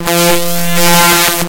glitch saw
some nice sounds created with raw data importing in audacity
glitch, audacity, strange, electronic, weird, experimental, raw-data, freaky, noise